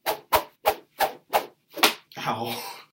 I Literally Just Swung A Plastic Coat Hanger Around To Produce This Sound. The Last One I Just Hit Myself In The Leg On Accident
swing, swoosh, woosh